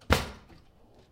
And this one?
Kitchen Drawer Close 2
Another one, the kitchen drawer closes. You can hear the cutlery shaking.
Recorded with Sony TCD D10 PRO II & Sennheiser MD21U.